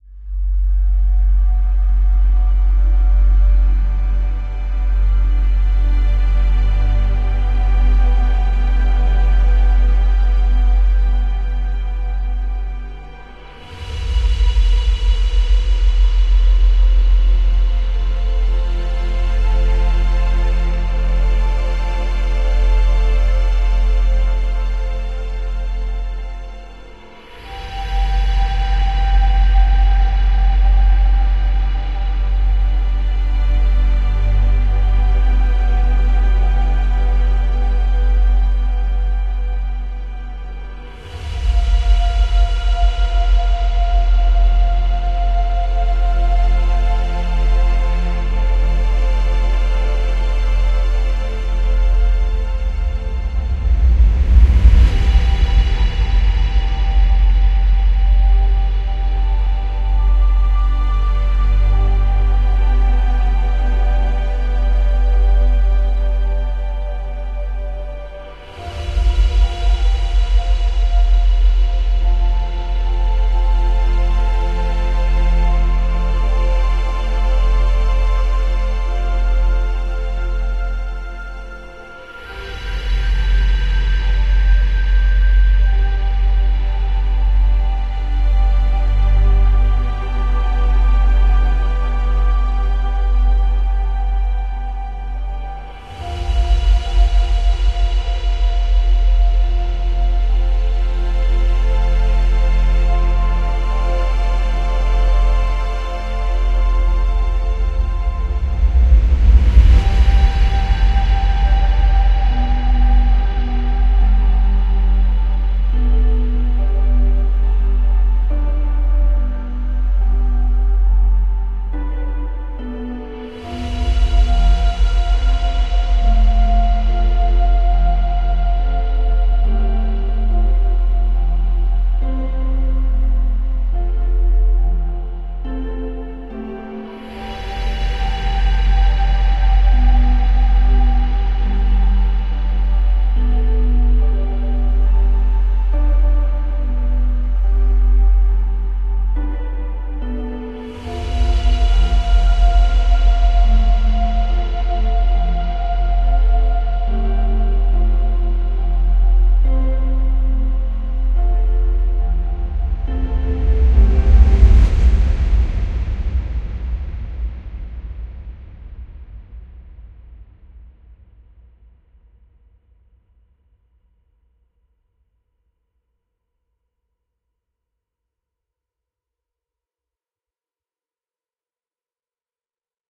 Curious Ambience
An atmospheric track great for films and video games. I made it with FL Studio and EW Composers cloud. I would love to see what you do with it! Send a Link!
Piano, Harp, Vocal, Music, Fiction, Fantasy, Dwarf, Curious, Calm, Strings, Cinematic, Ambient, Beautiful, Elf, Soft, Flute, Game, Video, Film, Mystery